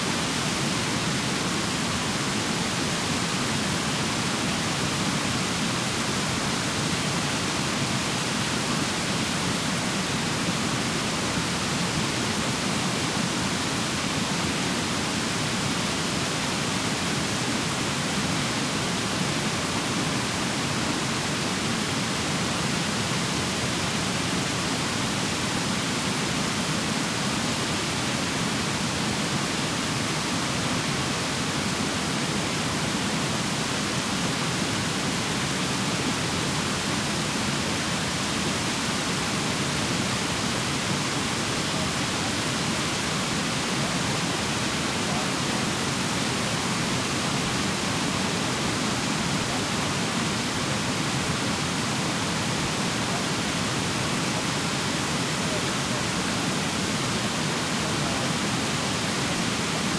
I'd love to hear about the projects you use my sounds on. Send me some feedback.